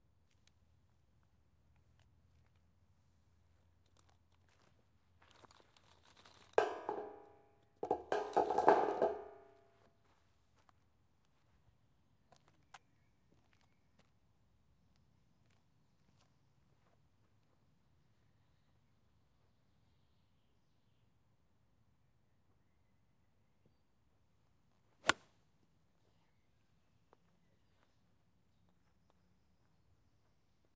HSN golf iron five
Golf hit with an iron seven from Yonex.
swing, sports, golf